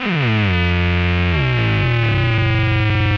som-hi
the human and the machine, in a free relationship. up and down.
analog, mtg, studio, synthesis